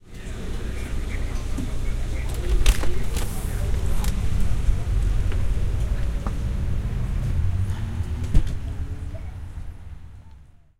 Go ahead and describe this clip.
Leaving a family owned cafe. Recording starts inside, then opening the door to outside.